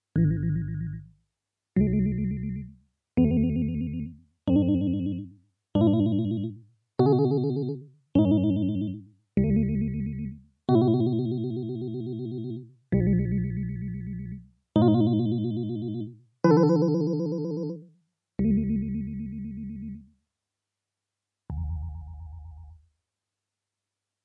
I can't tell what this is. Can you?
Wobble Telephone
Wobble gobble sounds coming from a synthesizer.